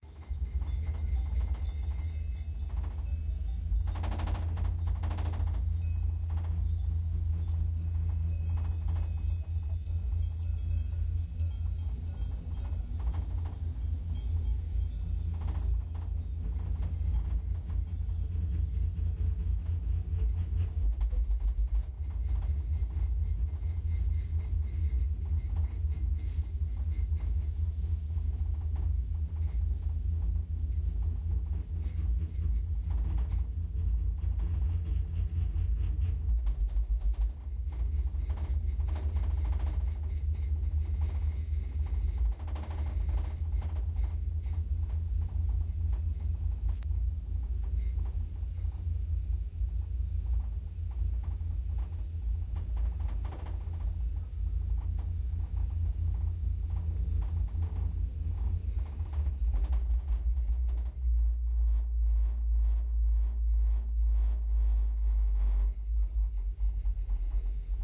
Sound from inside a mountain chair lift. There's some cow bell too.